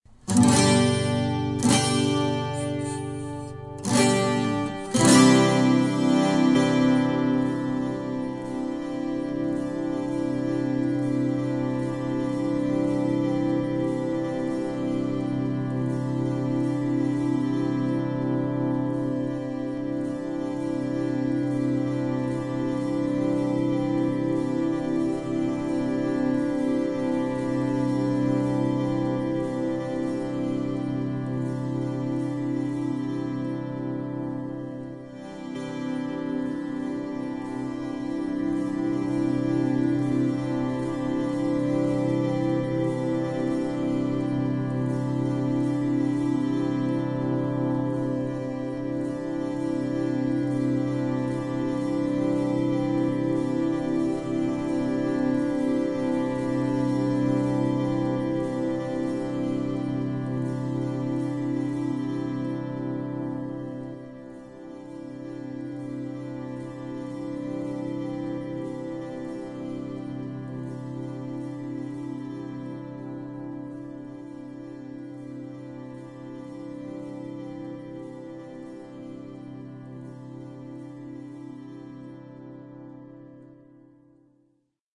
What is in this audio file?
This is kind of neat....a g-chord played on my 12-string, then looped several times so it sounds like it is holding for a long time
peaceful, fade, 12-string, guitar, shimmer